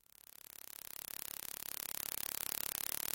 Static Popper
Poppy static sounds.